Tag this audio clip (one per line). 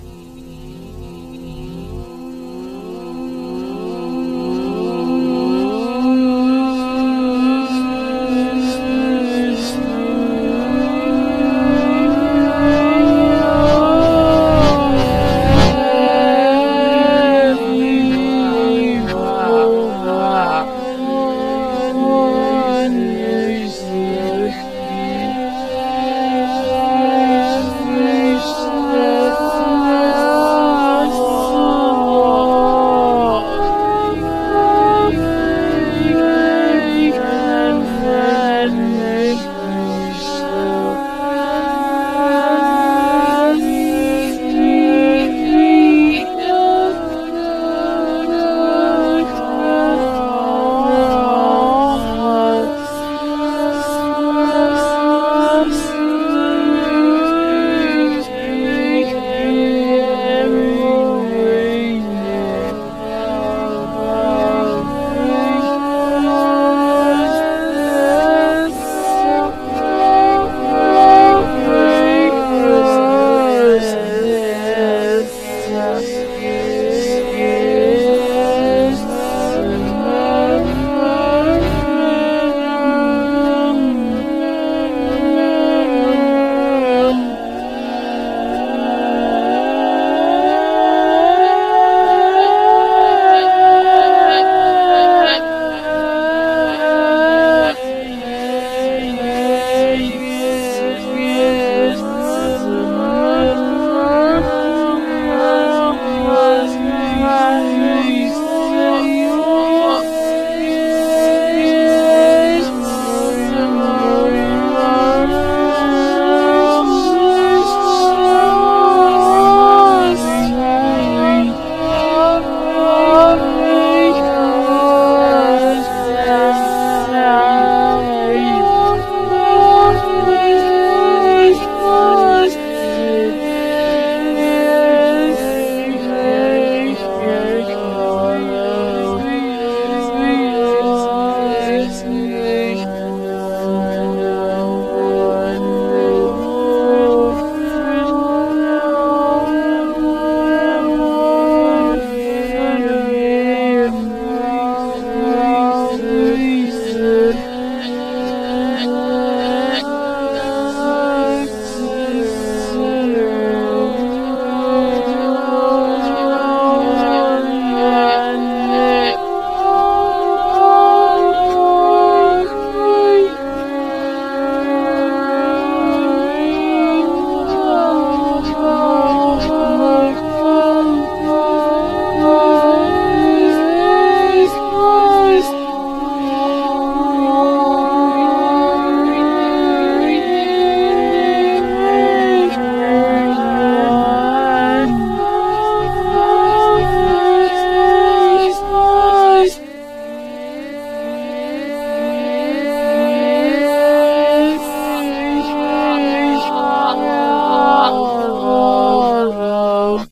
creepy
Halloween
Horror
Monster
Original
Radio
scary
Scream
Spooky
Zombie